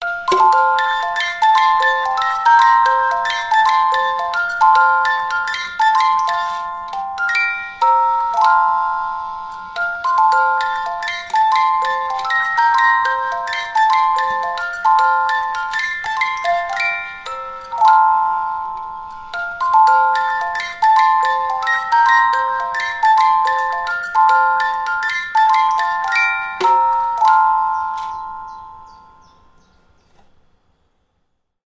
pozytywka music box